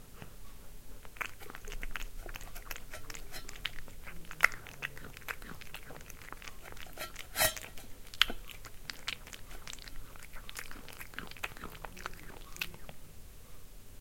Katze schmatzt und leckt Schüssel aus
Cat eats smacking and licking out bowl